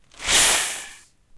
An artificial sound of air brakes on a bus, created by using my mouth and rustling some thin plastic bags, slowing and pitching some of them down and combining them.
An example of how you might credit is by putting this in the description/credits:
The sound was recorded using a "H1 Zoom V2 recorder" on 5th May 2016, also using Audacity.